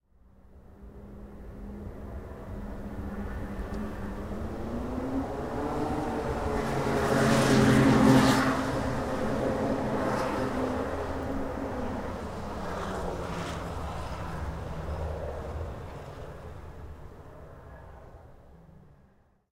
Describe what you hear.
snowmobile pass fast nearby echo doppler quick funky
doppler, echo, pass, snowmobile